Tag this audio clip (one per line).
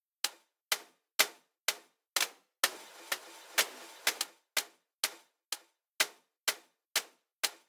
digital
loop
lofi
hihat
patterns
hi-hat
hat